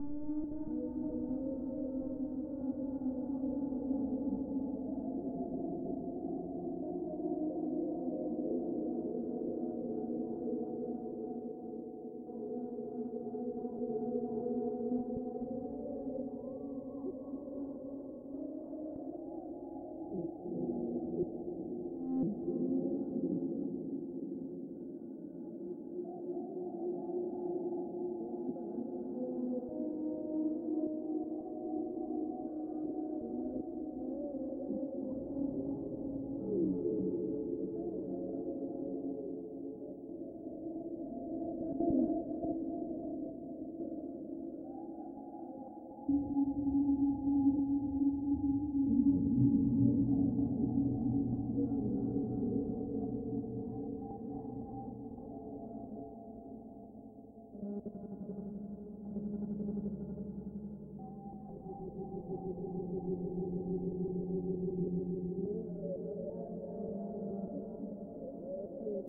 creepy ambience
ambience, creepy, halloween